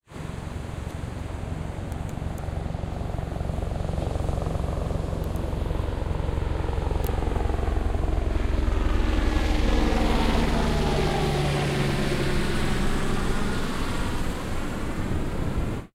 Helicopter overhead. Venice Beach, LA. 2019
Helicopter passing overhead/ LA, Venice beach. January 2019
beach, binaural, helicopter